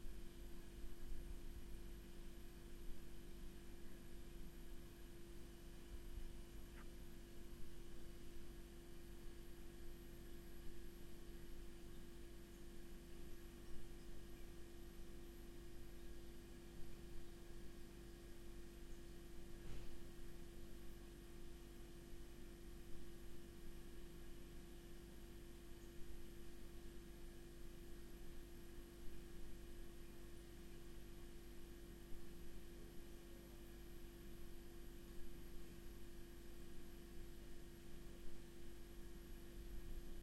H4 - FOndo Cocina - Kitchen Background ambience.
ambiente de cocina, kitchen ambience
kitchen, domestic-sounds